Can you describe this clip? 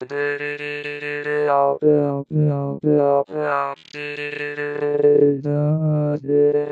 generated using a speech synthesis program, using random syllables and letters. filtered high-end noise and added light reverb. Slowed, stretched and filtered again for noise. added heavy phaser.